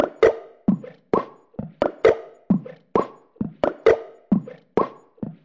FX Audio loop4
produce with analog Arturia tools , many DAW´s and Vst
2 bar loop
fx, hardstyle, electro, effect, house, rave, te, electronic, freaky, dance, techno, loop